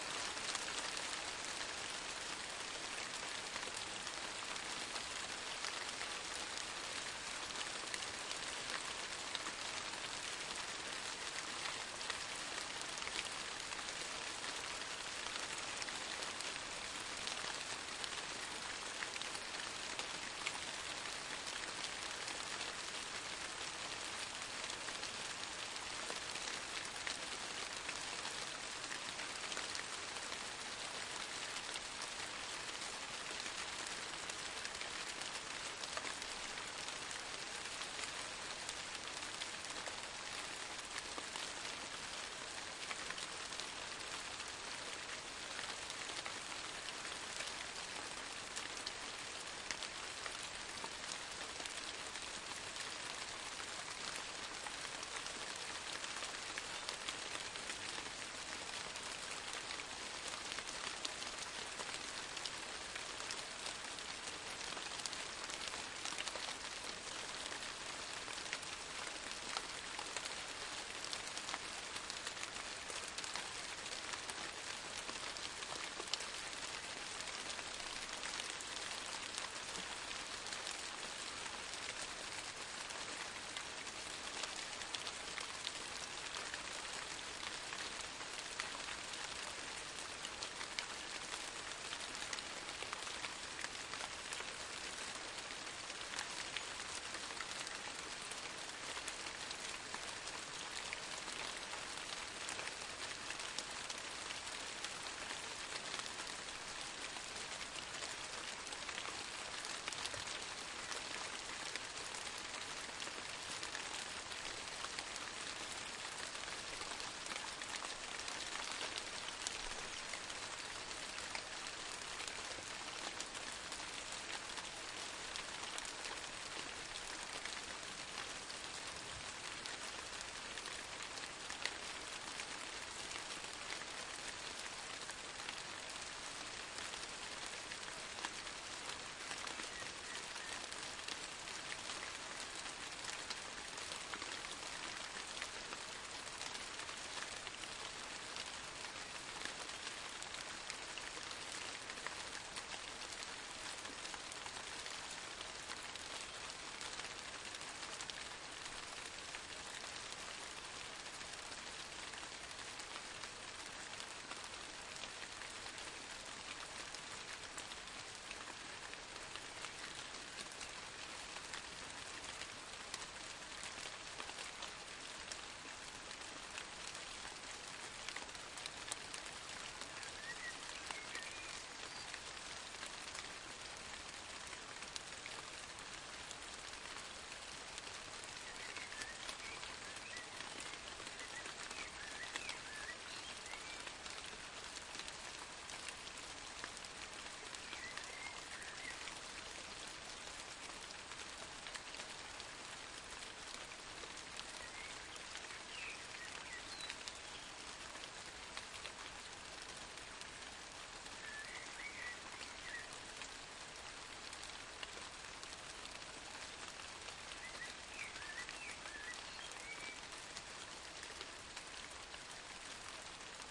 Rain...this time recorded with an AudioTechnica microphone AT835ST (the MS setting), a Beachtek preamp and an iriver ihp-120.
weather, raining, athmosphere, fieldrecording, rain